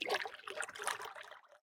Water Paddle soft 021
Part of a collection of sounds of paddle strokes in the water, a series ranging from soft to heavy.
Recorded with a Zoom h4 in Okanagan, BC.
water, zoomh4, lake, splash, paddle, river, boat, field-recording